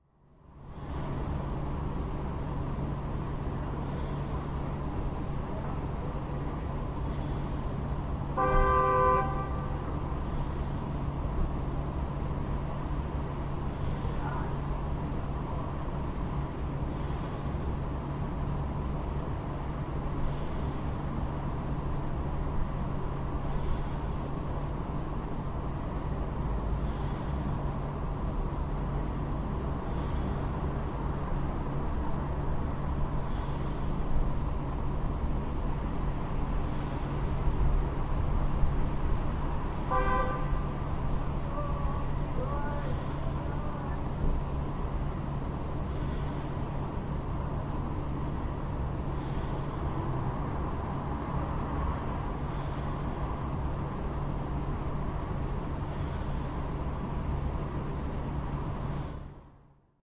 People that visited my neighbours are leaving, stressing their good bye with two honks. It's about 4:00 am. I am asleep on the third floor where I switched on my Edirol-R09 when I went to bed.
traffic, human, body, field-recording, breath, street, bed, street-noise, noise, household, nature, engine